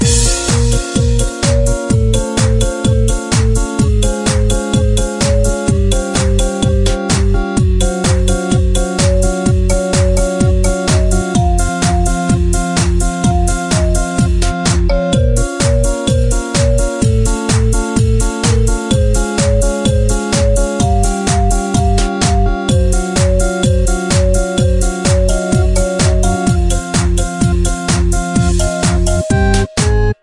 Loop Computer Feeling Good 05
A music loop to be used in fast paced games with tons of action for creating an adrenaline rush and somewhat adaptive musical experience.
battle, game, gamedev, gamedeveloping, games, gaming, indiedev, indiegamedev, loop, music, music-loop, victory, videogame, Video-Game, videogames, war